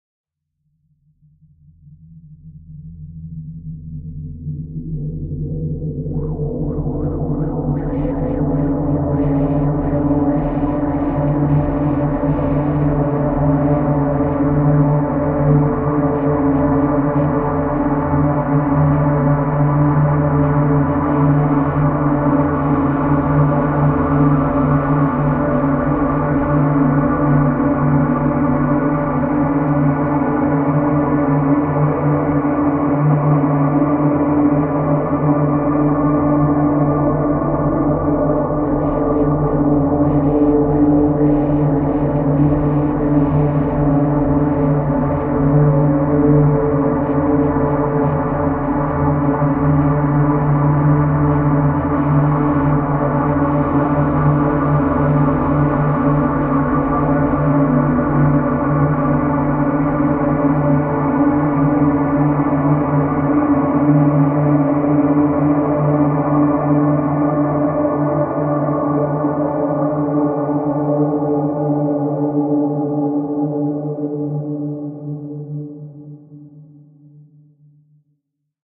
Enigma 04 FX 02
This started as a long solo piano piece; it was then processed with delay, reverb, multiple filters and a couple of plugins that I can't describe. I took the final file and cut it up into 6 smaller files.
noise, ambient, electronic